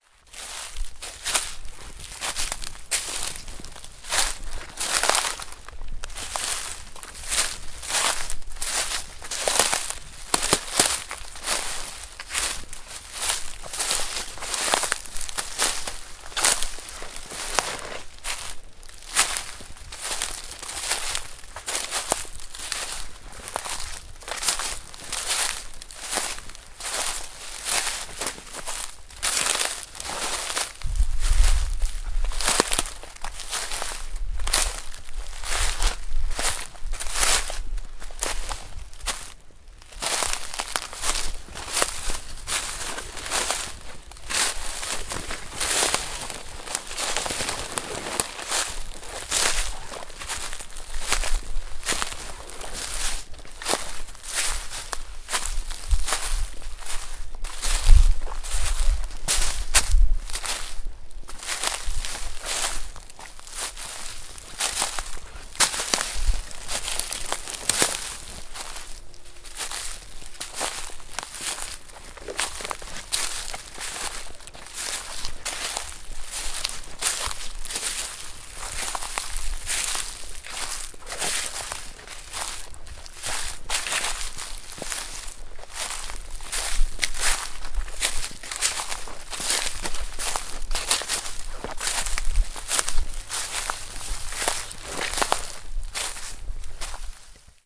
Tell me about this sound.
Walking on pine needles on a late-winter day in the middle of a peaceful forest of 45 foot tall white pines. Recorded in early March using the Zoom H4N recorder and it's built-in stereo microphones.
ambience, field-recording, Forest, nature-scape, outdoorssolitude, trail, woods